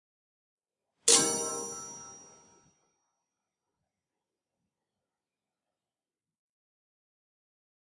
Chime Strike, Variant #6
Plastic pen striking several simultaneous rods from this set of grandfather clock chimes:
Set contains eight rods roughly corresponding to these notes in scientific pitch notation: D#4, F4, G4, G#4, A#4, C5, D5, and D#5. Some were intentionally muted with my fingers while striking. I don't remember which (and don't have the ear to tell casually ... sorry), but they are the same notes as in other variants of this sound in the sound pack. Intended for organic non-sample-identical repetition like when a real clock strikes the hour.
Recorded with internal mic of 21.5-inch, Late 2009 iMac (sorry to all audio pros 😢).
chime, chime-rod, chimes, chiming, clock, clockwork, grandfather, grandfather-clock, hour, strike, time